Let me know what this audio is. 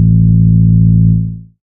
this is a bass sound, not tuned but it is a perfect loop withouth clicks
IT HAS NO PANNING EFFECT - i will do that on another sample
IT IS NOT TUNED - USE A PROGRAM THAT TUNES THE SAMPLES TO TONAL
YOU MUST USE IT ONLY IN ONE OCTAVE FROM C5 TO C6
IN OTHER OCTAVES SOUNDS NOT LIKE A NORMAL BASS